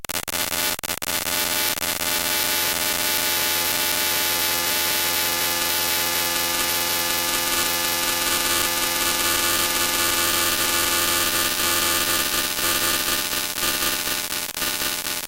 Sound made by the sonar of an unearthly creature... All sounds were synthesized from scratch.
dry, hollow, sfx, silence